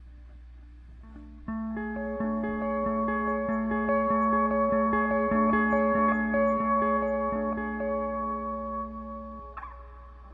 ae guitarArpegiation
arp, arpeggiate, guitar, guitar-arpeggiation, stereo